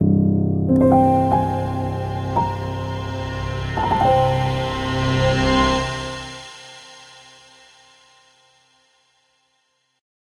dramatic production logo
a dramatic cue suitable for use as a film company's production logo or a transition in or out of something mysterious.
not too spooky, but not too un-spooky either